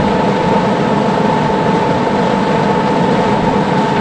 The slightly modified (bass boost) sound of an artillery system re-aligning itself.
Note that while the video uploader may not be a soldier, the video material was made by an US Army soldier during duty.